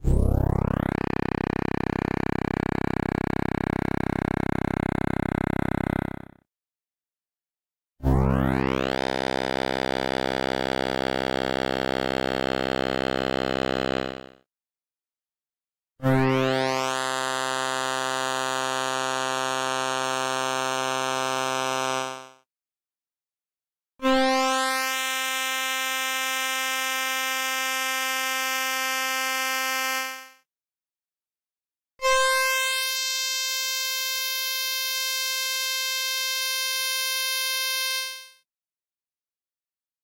EVOLUTION EVS-1 PATCH 076
Preset sound from the Evolution EVS-1 synthesizer, a peculiar and rather unique instrument which employed both FM and subtractive synthesis. This bass sweep sound is a multisample at different octaves.
preset, sweep, evs-1, synthesizer, synth, bass, evolution, patch